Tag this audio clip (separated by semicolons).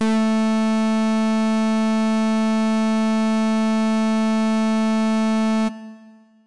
synth; synthesizer; fm-synth